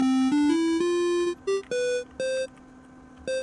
Square Fanfare
Classic 8 bit game sound sf